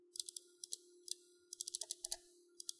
Mouse Clicks
A collection of mouse click sounds. Recorded on Blue Snowball for The Super Legit Podcast.
clicks, computer, foley, office, mice, mouse, clicking, click